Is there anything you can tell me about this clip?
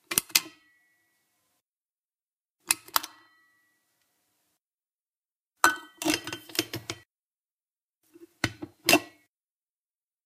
Just a few foley sounds of me pressing the open/close button on a steel Thermos flask, then putting the cap on and taking it off. Recorded with a 5th-gen iPod touch.